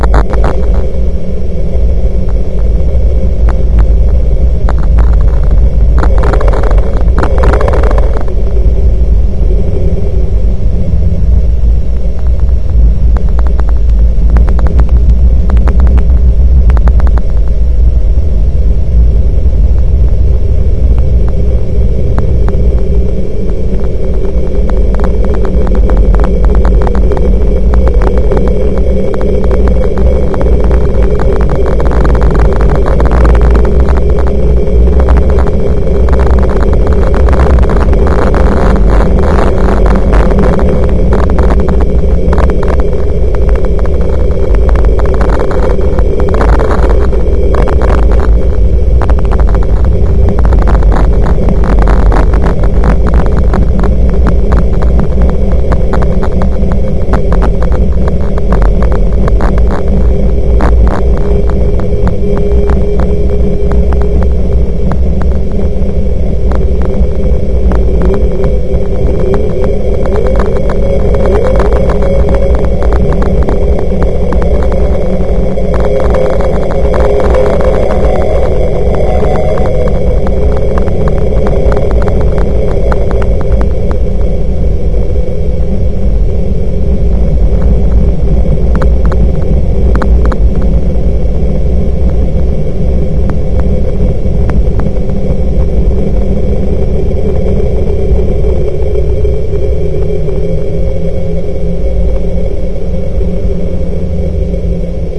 processed vocal recording through a modified sony tcm-200dv cassette recorder